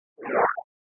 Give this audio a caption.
Supernatural space sound created with coagula using original bitmap image.